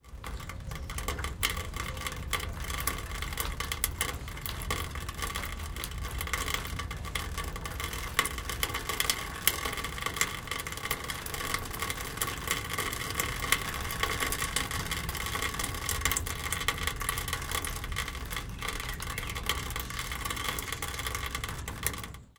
Rain gutter 1
aluminum, gutter, rain